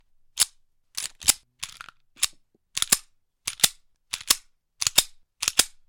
9mm Pistol Quick Load
9mm Taurus Pistol Various Quick Loaded